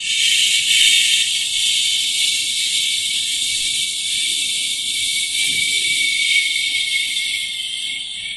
Tried making a sound which a gas grenade could make. Made with hissing in to the microphone and changing pitch and speed a little!